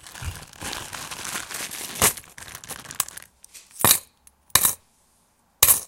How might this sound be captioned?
Rummaging through small metal objects